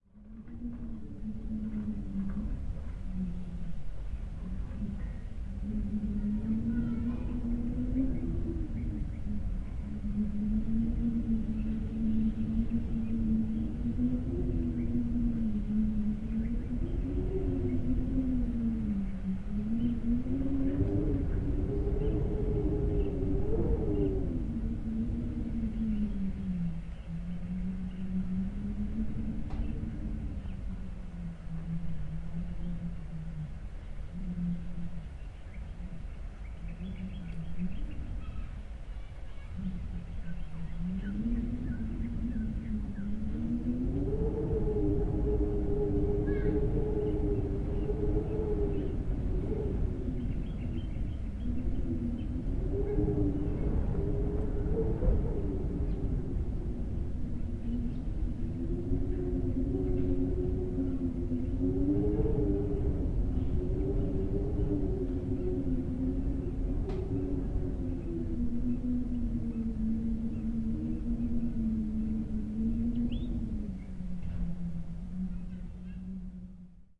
Spooky Wind Howl
The title says it, although i could add that it was recorded in a bathroom - natural reverb.
spooky, howling, wind